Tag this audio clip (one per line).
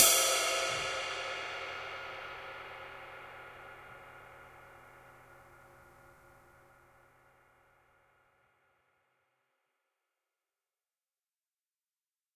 stereo
cymbal
drums